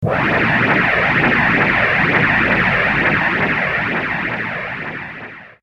bumbling around with the KC2